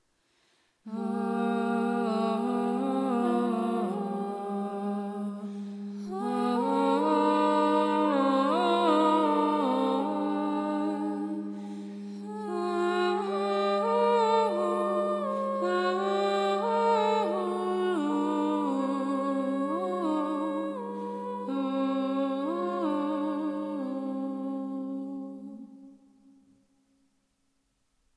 Monastery Sounds
An overlay of melodies in a Gregorian-esque way. I thought it sounded like something a group of monks might sing, hence the name.